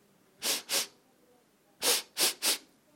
sniffing sound, sennheiser me66 > shure fp24>iRiver H120 / sonido de esnifada, olisqueo